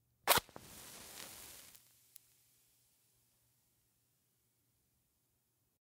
match strike 02
Striking or lighting a match!
Lighting a match very close to a microphone in a quiet place for good sound isolation and detail. One in a series, each match sounds a bit different and each is held to the mic until they burn out.
Recorded with a Sennheiser MKH8060 mic into a modified Marantz PMD661.
light, foley, smoke, strike, matchbox, cigarette, candle, fire, match